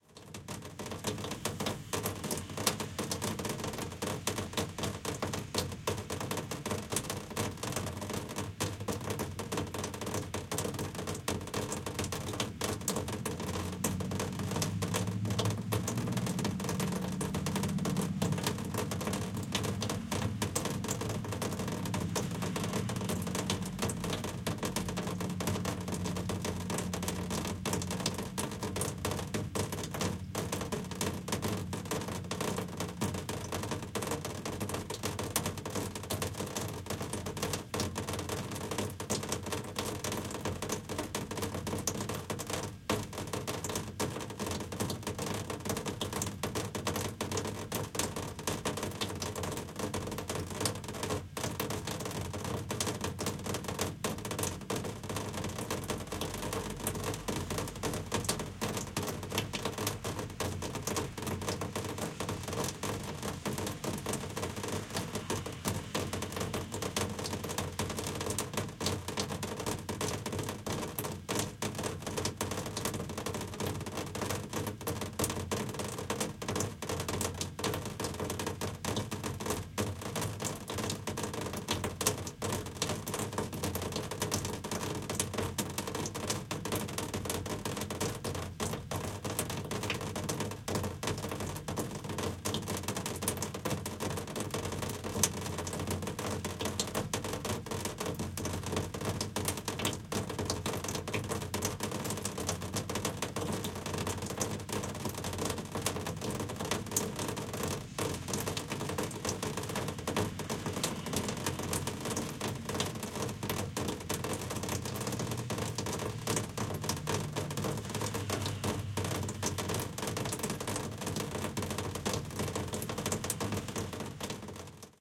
Rain on Sheet Metal 4
4 of 5. Close mic'd raindrops on a window air conditioner. Narrow stereo image. Some distant street noise. Try layering all five or panning them to surround channels.
car, Rain, sheet-metal